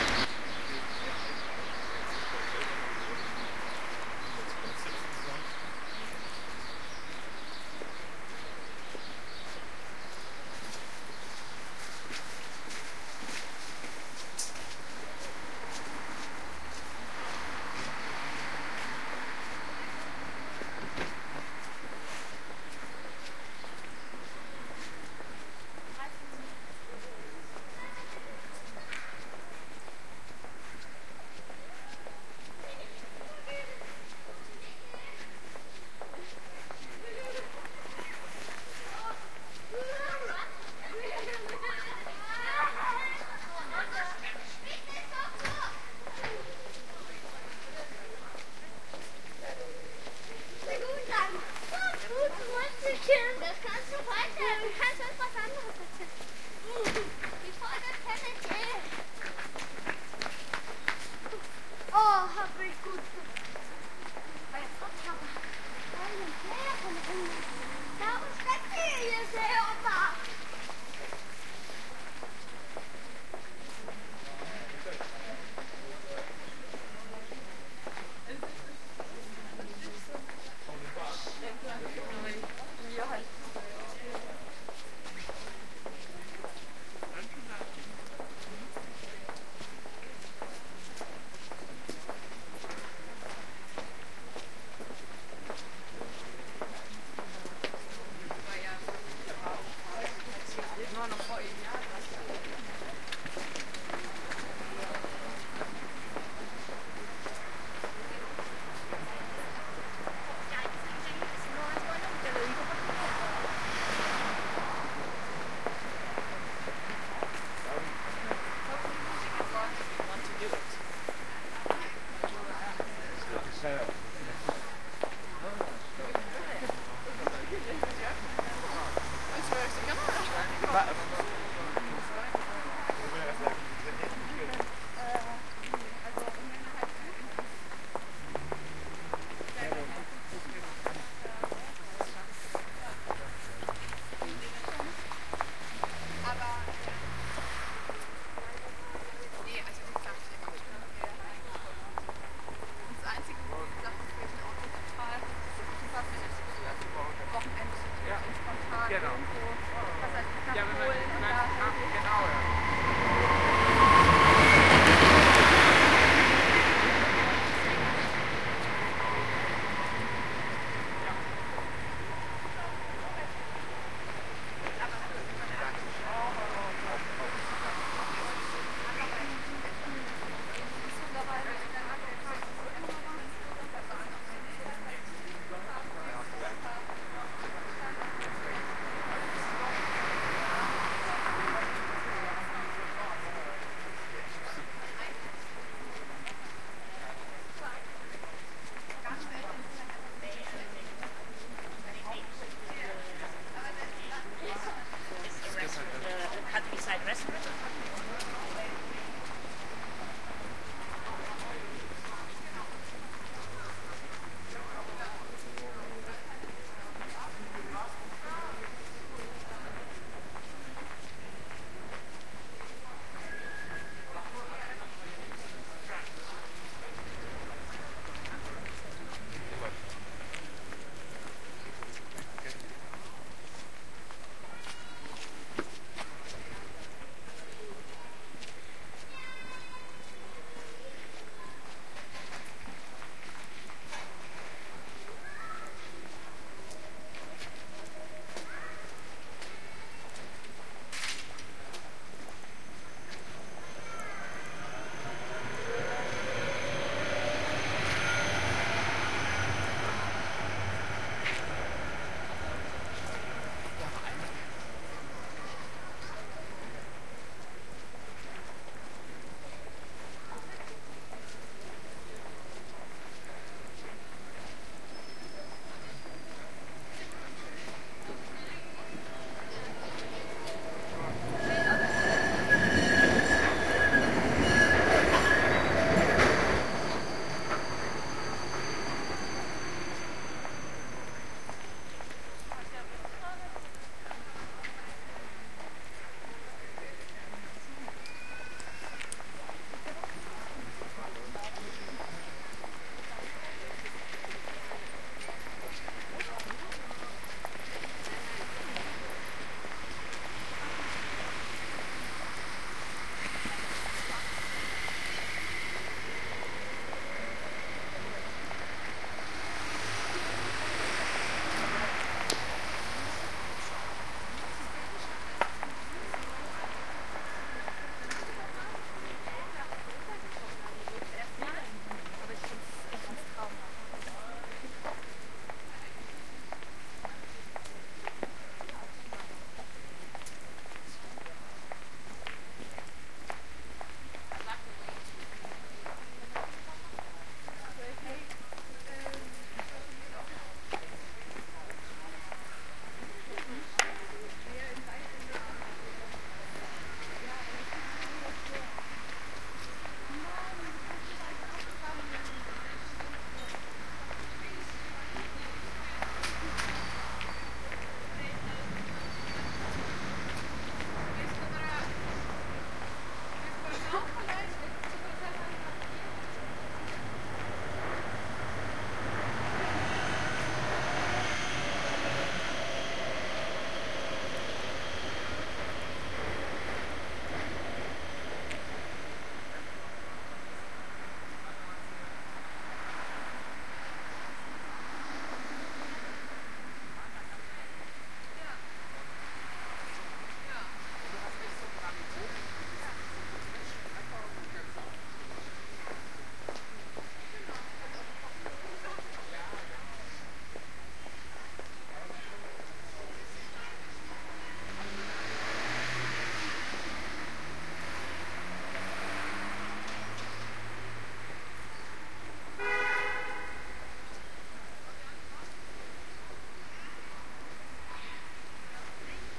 walking through berlin
Walking along "Kastanienallee" in Berlin on a sunny day in spring. Recorded with Soundman OKM.
City, Traffic, Walking